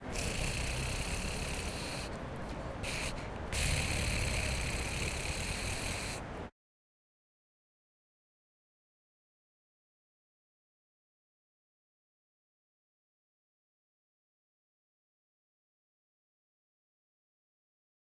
AmCS JH TI20 gggggg
Sound collected at Amsterdam Central Station as part of the Genetic Choir's Loop-Copy-Mutate project
Time, Amsterdam